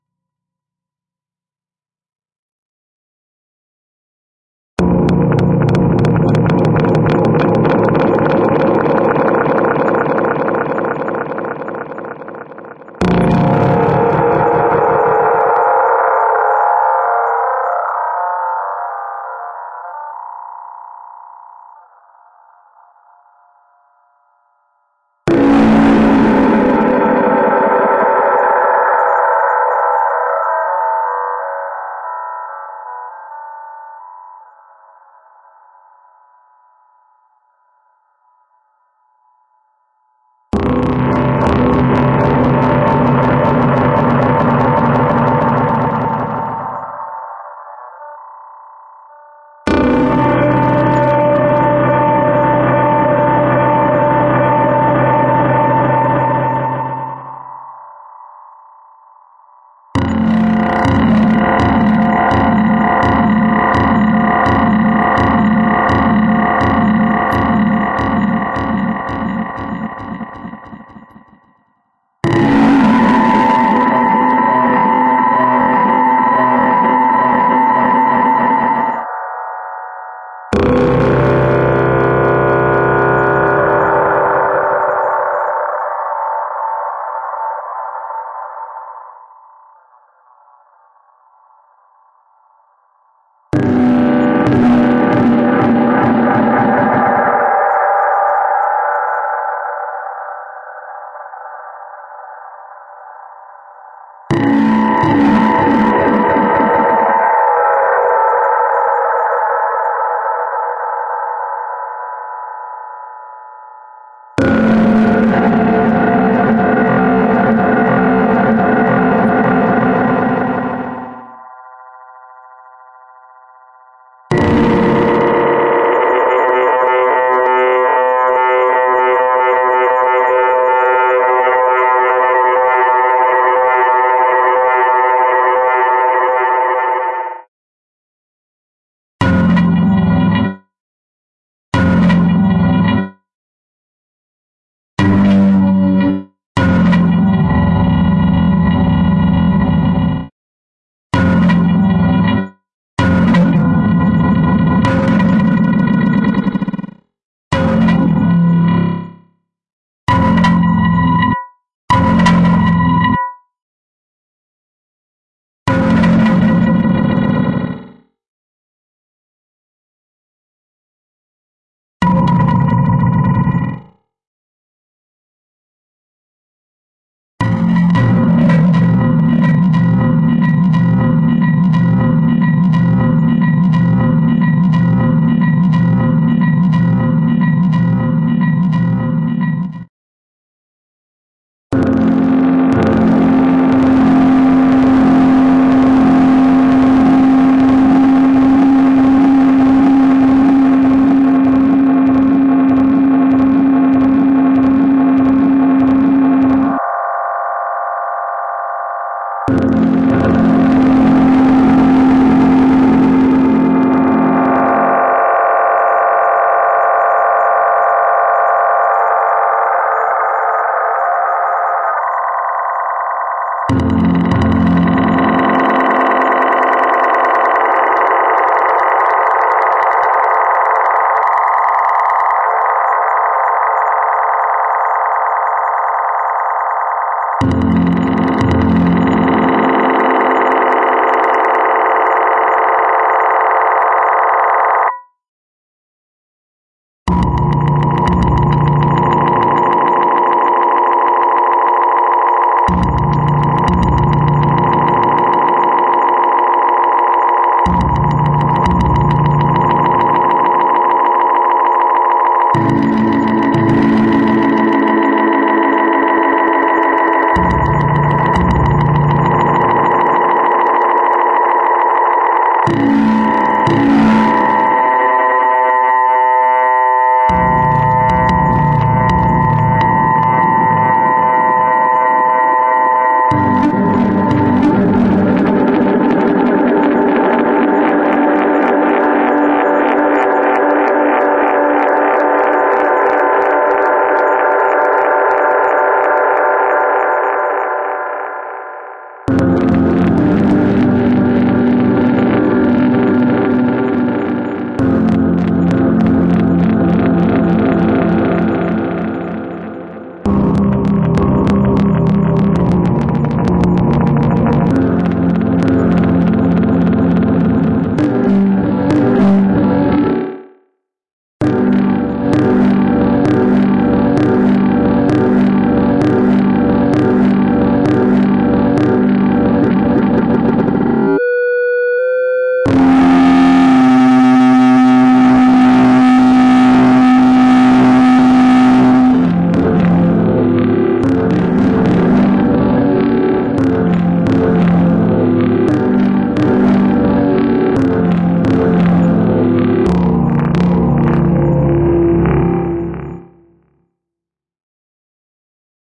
November 10th Delays
A sampler, with a delay playing standards through a number of live delays, whilst adjusting settings.
delay, dub, sampler